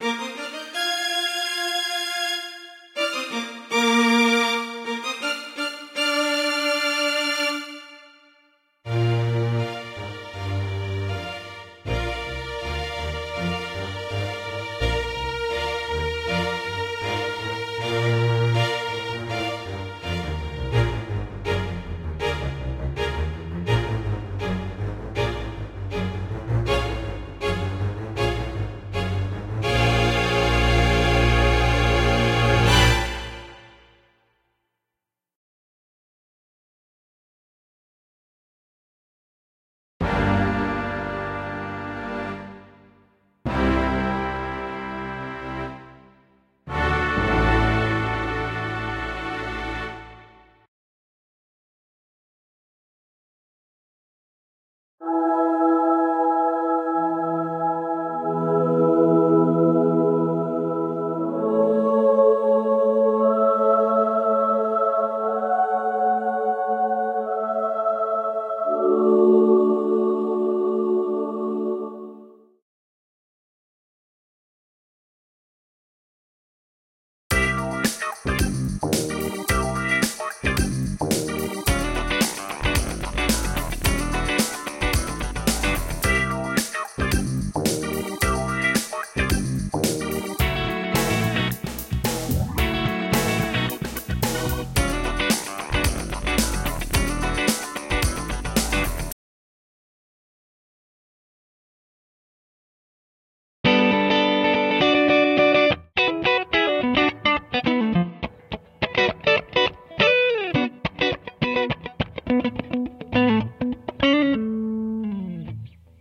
Music Pieces
Some loop-able and transition sound pieces made by me using sound bites from Jason Levin. Some are Loop-able and some are stand alone, If you make anything please share it, I'd love to see it :)